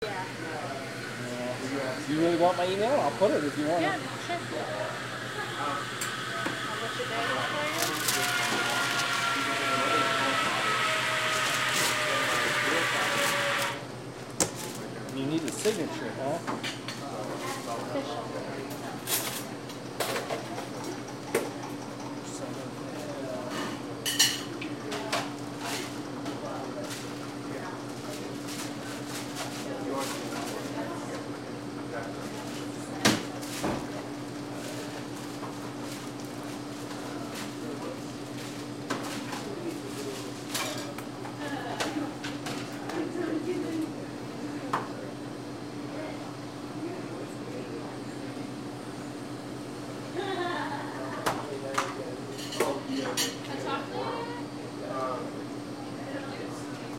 general behind counter
This is a recording of natural sound being the counter at the Folsom St. Coffee Co. in Boulder, Colorado. It includes the room tone, patrons conversing to each other, the baristas taking and preparing orders, and the sounds of university students doing their homework in the background.
shop, coffee, barista, counter